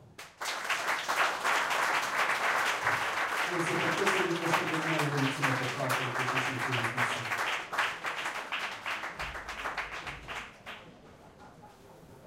261110 - Budapest - Jazz Club 2
Applause during jazz concert in Budapest jazz club.
audience, hand-clapping, applause, aplause, ambience, applaud